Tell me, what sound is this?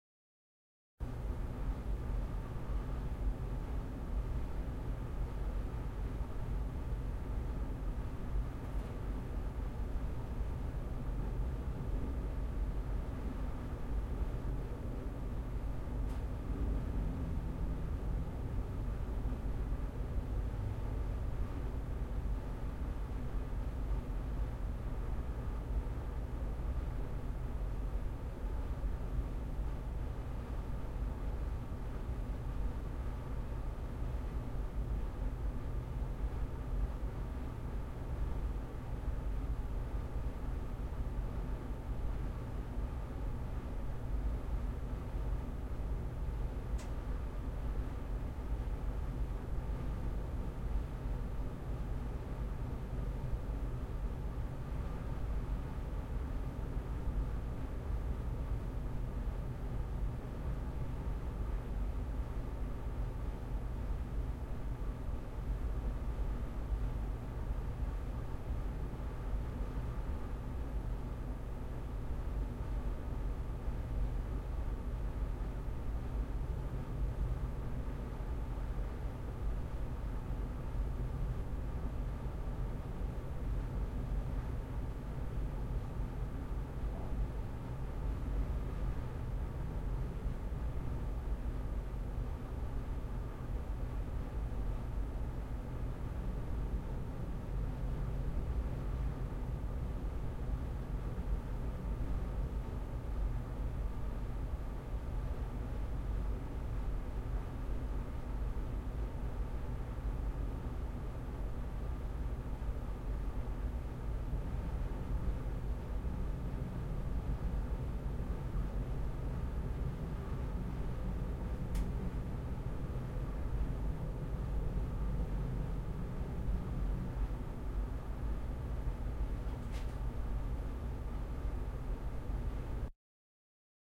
Room Tone Ambience Medium Control Low Hum
This was recorded in the control room of a large theatre. There are a few machine racks.
ambience, hum, low, room, Theatre, tone